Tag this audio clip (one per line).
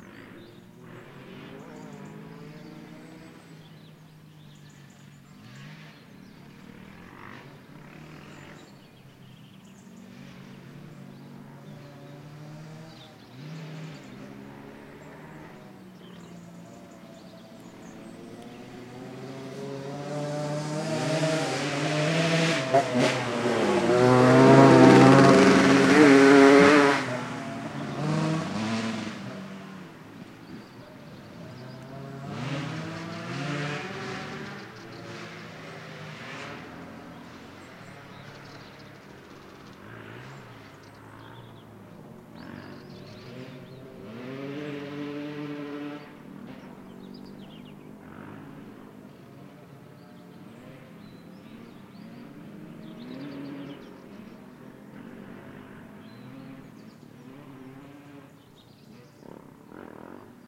nature
offroad